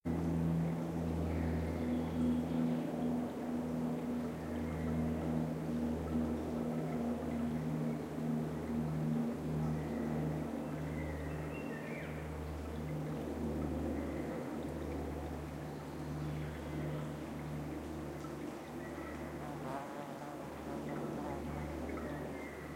field recording little processed in post, location is canyon of river Rjecina (mill Zakalj) near town Rijeka in Croatia